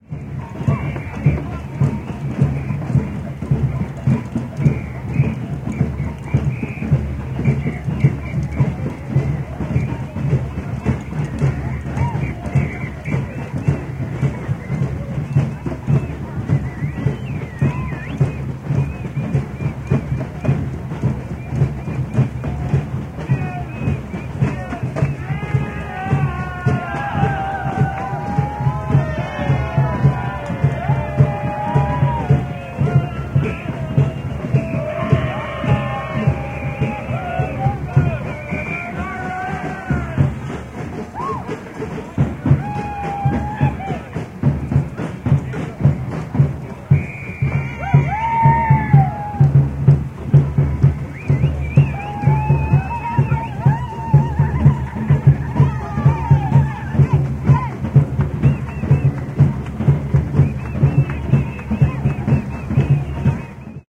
03-Carnival Band Ambience
Carnival in Copenhagen 9. juni 1984. Ambience recorded in mono on Nagra IS with a dynamic microphone, 3 3/4 ips. An amateur samba band plays in the street, several spectators are heard as well.
ambience, Carnival, samba-band, spectators